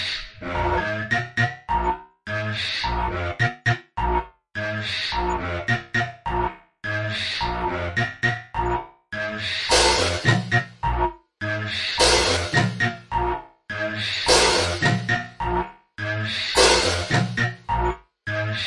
Beat29 (105bpm)
105 bpm of pure pleasure, from the Beataholic pack.
Created with Ableton.